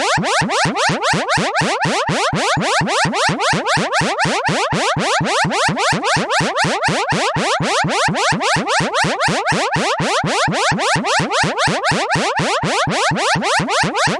Cartoon-like siren recreated on a Roland System100 vintage modular synth
cartoon, sci-fi, siren, Synthetic